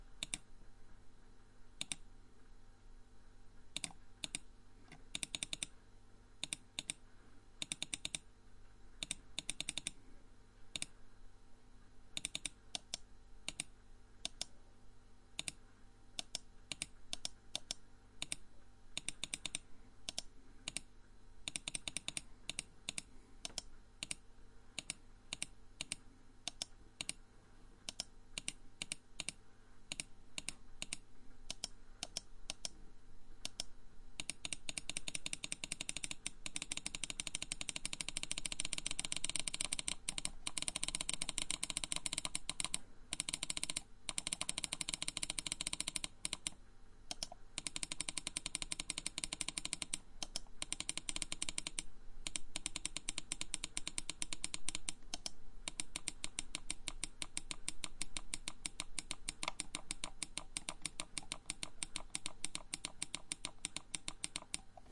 computer mouse clicking
office, mouse, clicking